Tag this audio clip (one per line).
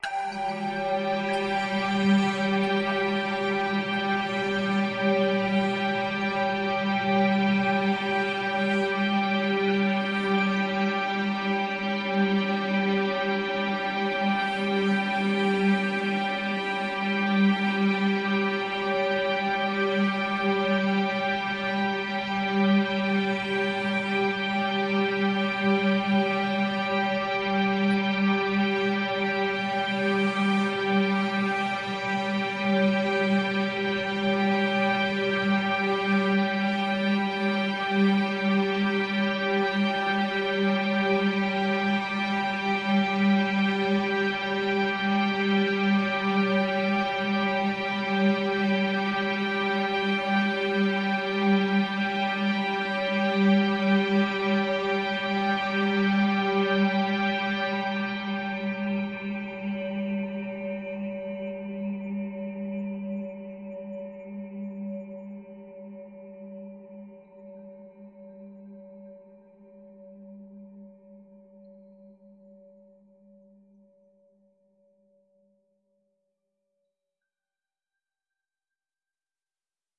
organ
pad
drone